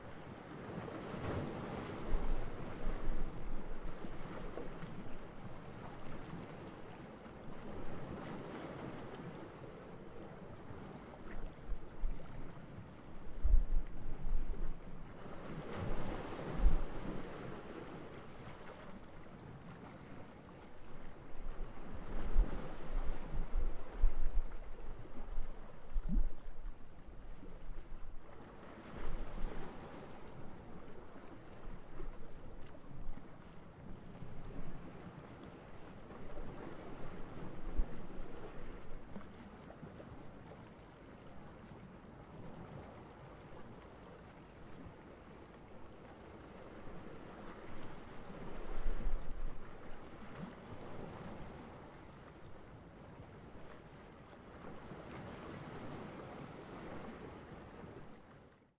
recorded at Baia del Rogiolo, Livorno Italy, over the gravel at 1mt from sea waves
mediterranean, water, bay, shoreline, gravel, nature, stereo, soundscape, ambient, ambience, waves, sea, ocean, beach